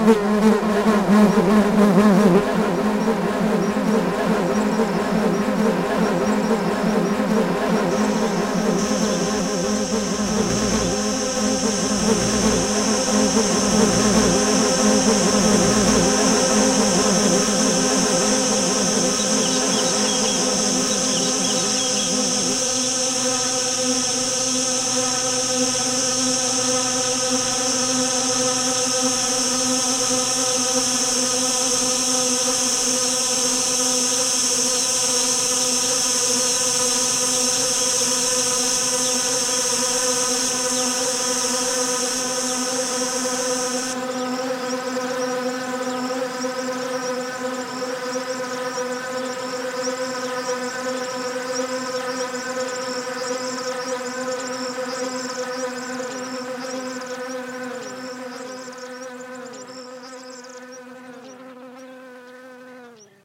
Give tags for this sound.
insects processed nature bees panic swarm

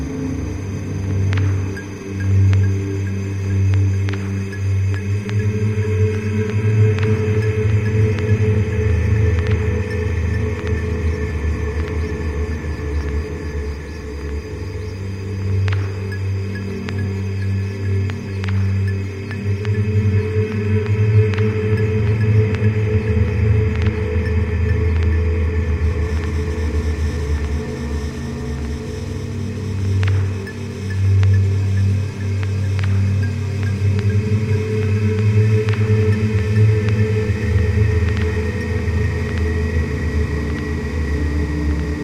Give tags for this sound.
creepy dark echo eerie glitch singing spooky vocal voice

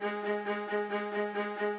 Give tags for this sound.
sample Violin String

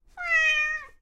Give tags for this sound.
cat kitty meow meowing

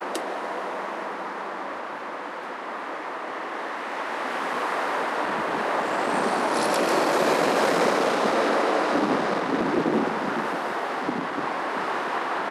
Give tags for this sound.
Cars recording road

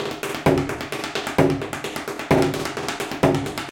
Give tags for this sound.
percs metal break loop beat acoustic fast cleaner breakbeat beats drum-loop perc music drum bottle hard 130-bpm dance industrial funky groovy drums food container improvised loops percussion ambient garbage hoover

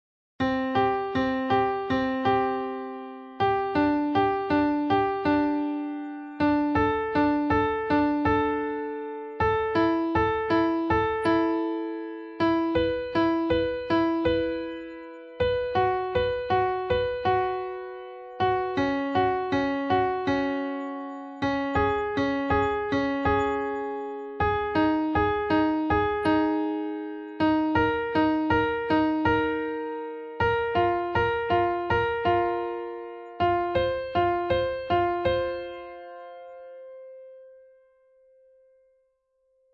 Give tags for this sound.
c from

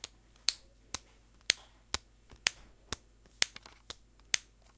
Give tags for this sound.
Essen Germany January2013 SonicSnaps

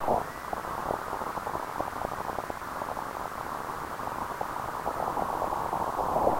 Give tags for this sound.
ambient field noise recording sample

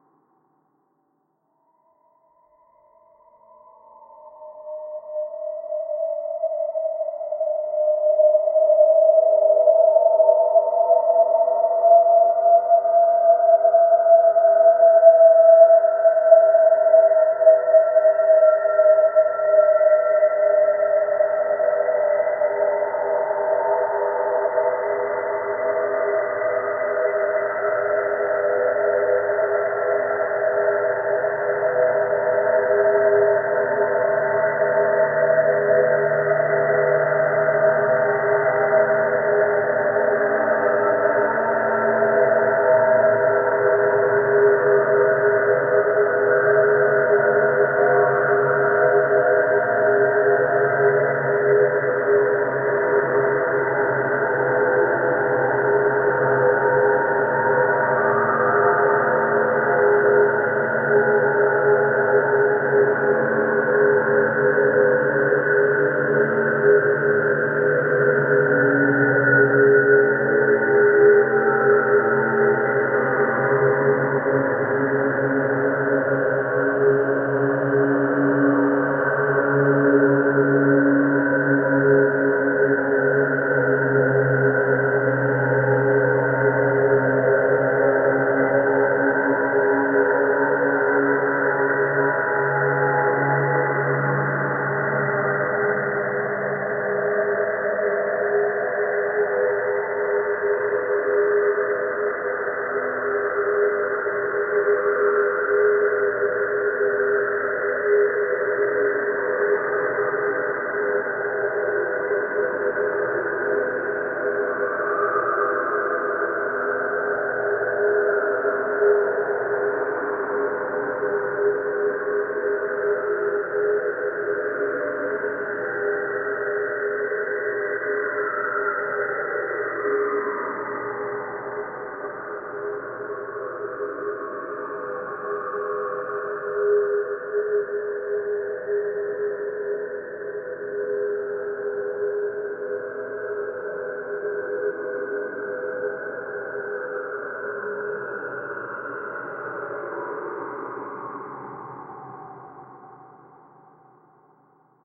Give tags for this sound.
multisample ambient horror pad evolving soundscape artificial drone freaky

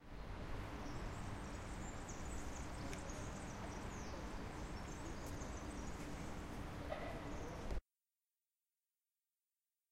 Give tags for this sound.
nature square field-recording

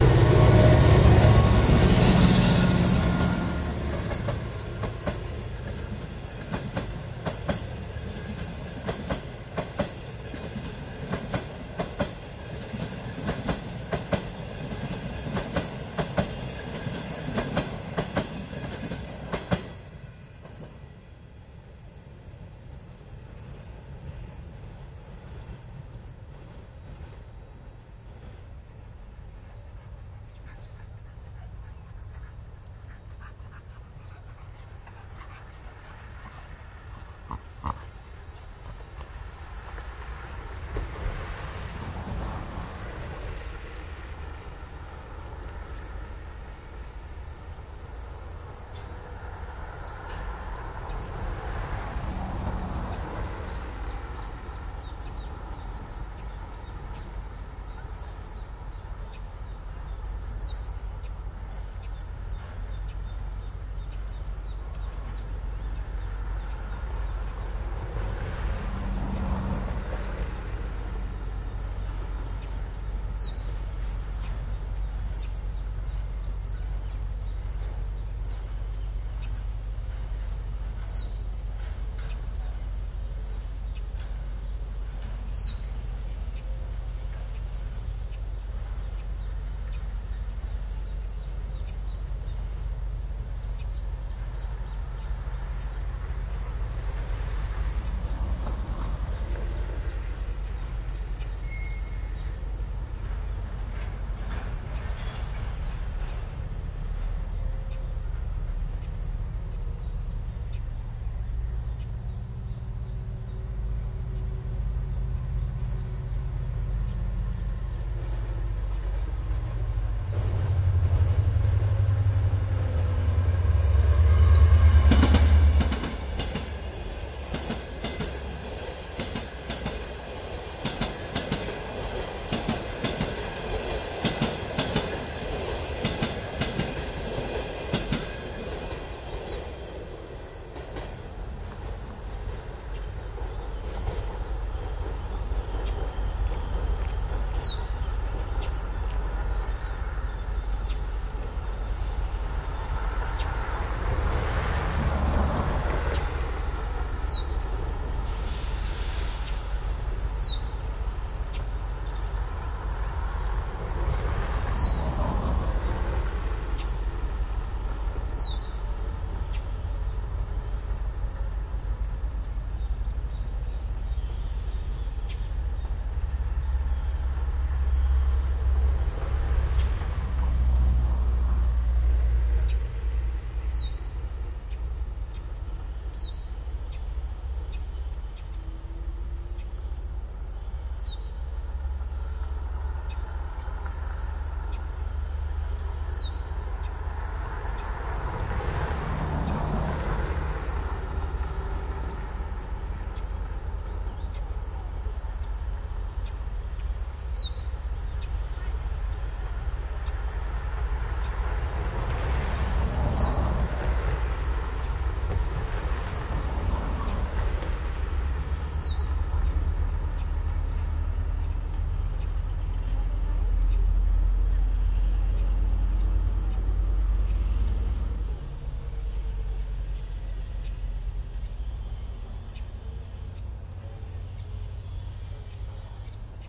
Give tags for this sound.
argentina field-recording train usi-pro santos-lugares

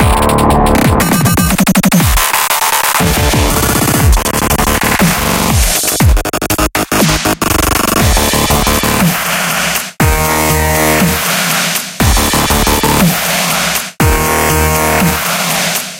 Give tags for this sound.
rave effect dub-step crazy-rhytm drop rhytm hop dubstep dub glitch-hop minimal mastering dubspace

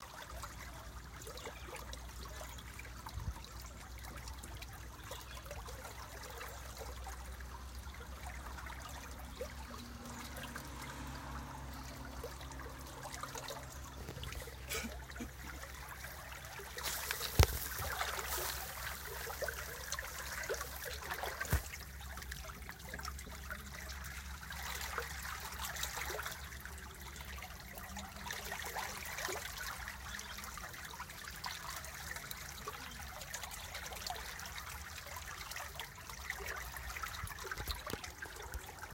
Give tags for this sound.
through canal flowing

